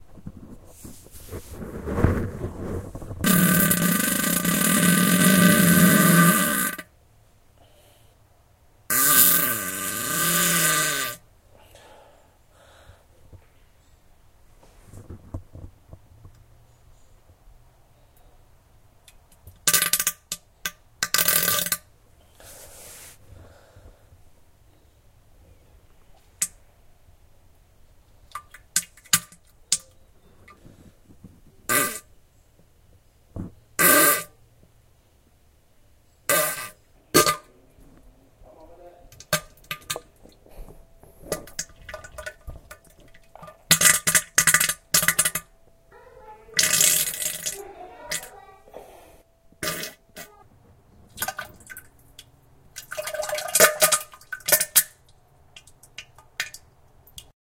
Fart Sound effect | Diarrhea Sound
Fart Sound
These sound is the real sound of some one going through a wet fart while having diarrhea.
Enjoy guys.
Please remember to give me some feet back. Thanks!!
Diarrhea, wet-fart, Fart-sound, Fart